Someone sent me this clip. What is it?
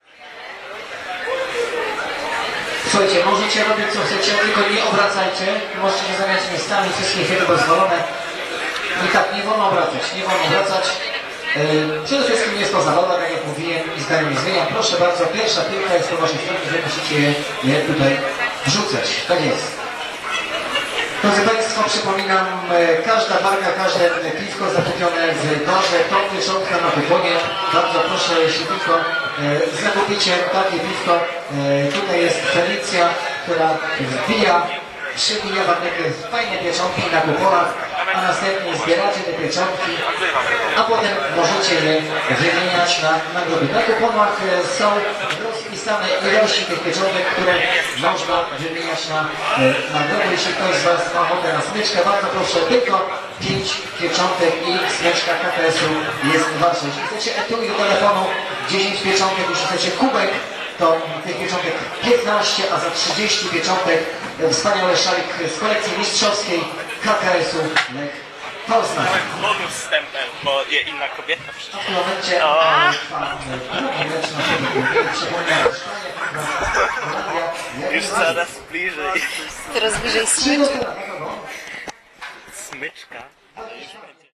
before final fifa match in the beer garden100710
11.07.2010: between 20.30 -23.30. in the beer garden (outside bar) on the Polwiejska street in the center of Poznan in Poland. the transmission of the final Fifa match between Holland and Spain.